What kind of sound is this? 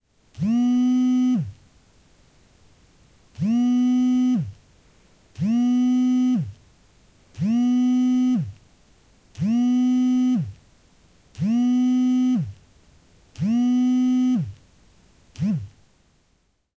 Sound of cell phone vibrator. Sound recorded with a ZOOM H4N Pro.
Son d’un vibreur de téléphone portable. Son enregistré avec un ZOOM H4N Pro.